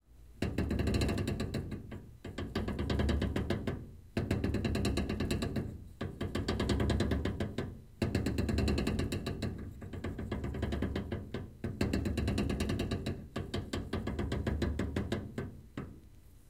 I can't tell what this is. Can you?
Metal Ripple - Gearlike
Rippling sound from a metal vent that has rhythm of gear movement